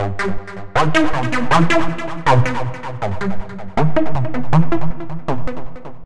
TS Spacey 159bpm 2
Simple music loop for Hip Hop, House, Electronic music.
electronic, hip-hop, house-music, loop, music-loop, sample, sound, synth-loop, trap, trap-music